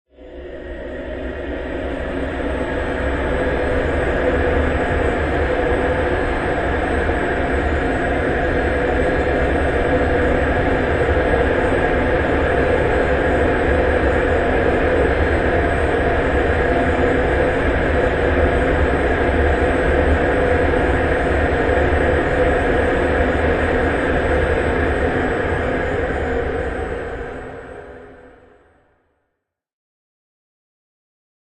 A dark, droning tone.